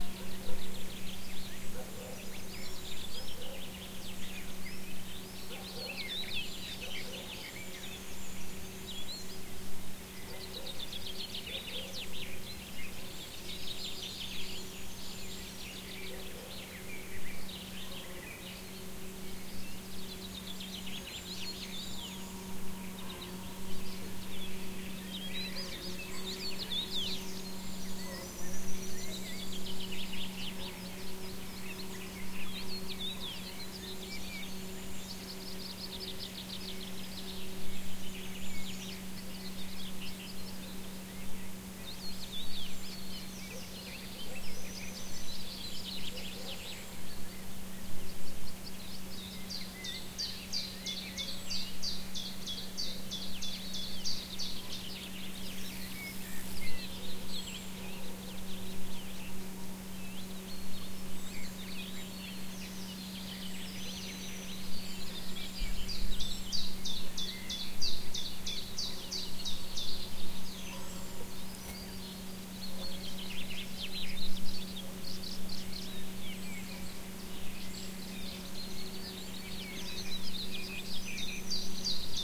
Lõuna-Eesti Mets Juuni1
Forest in the morning, Northern Europe, in June
bird, birds, field-recording, forest, june, nature, north-europe